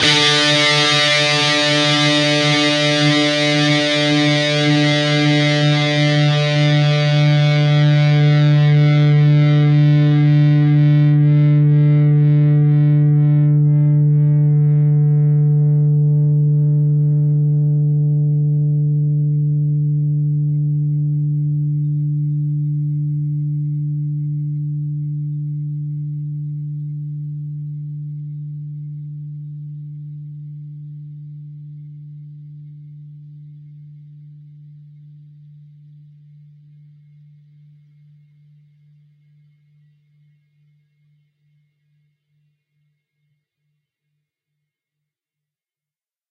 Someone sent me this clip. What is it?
Dist Chr D oct
D (4th) string open, G (3rd) string, 7th fret. Down strum.